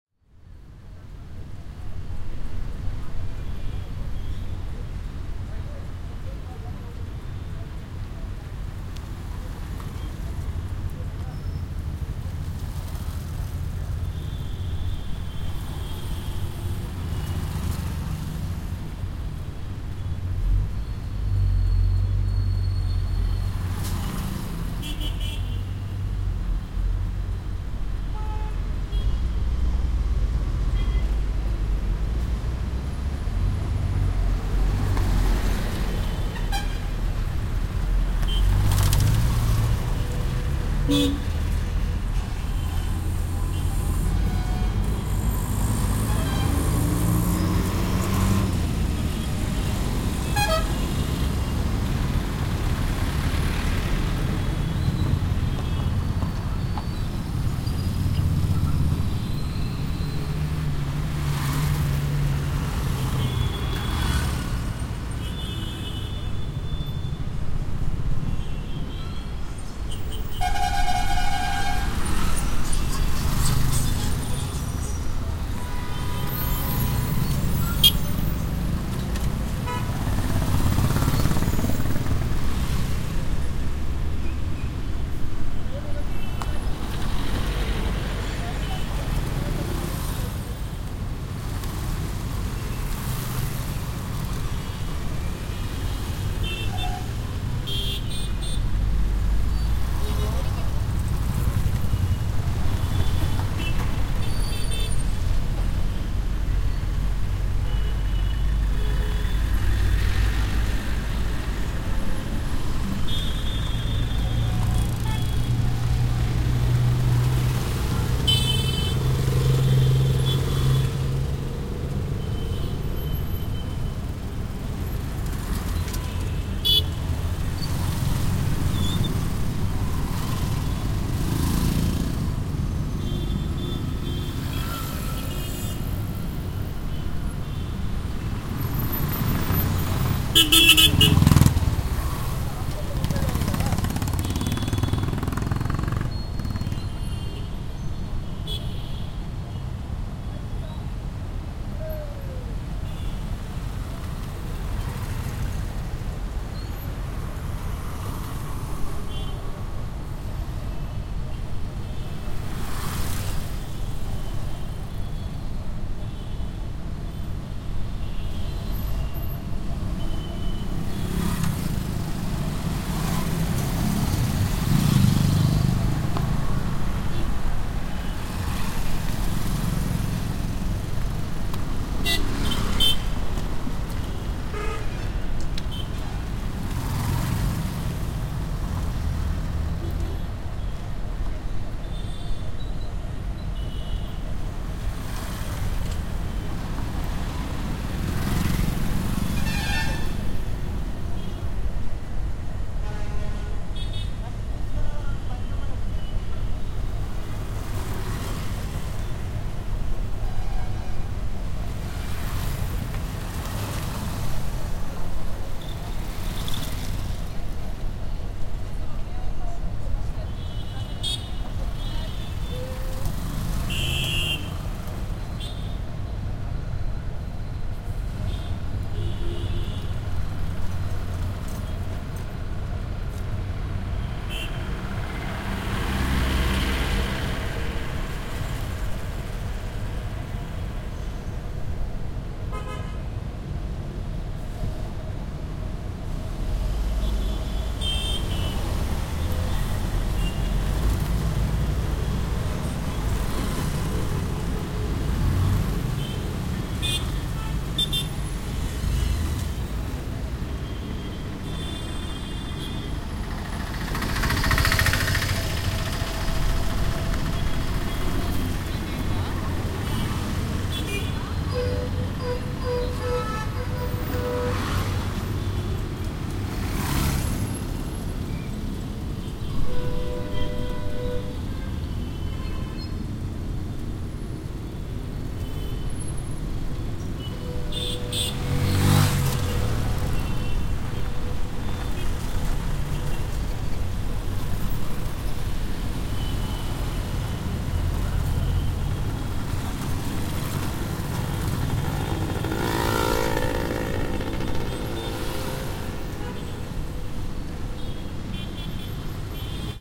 I've recorded this Street Ambience with a Zoom H1 Audiorecorder, edited in Adobe Audition. The recording contains the ambience of a very busy street in Chennai, Tamil Nadu, India. You'll hear many mopeds, trucks, cars, tuktuks and of course people passing by.